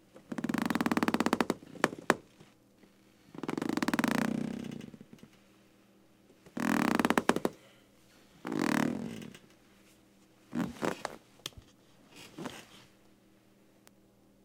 A creaky bed.
bed, creak, door, wood